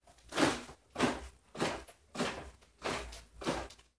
Marcha se acerca
foley of a group of soldiers marching
Foley, March, Soldier